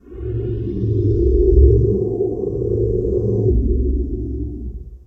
alien otherworldly space
alien, otherworldly, space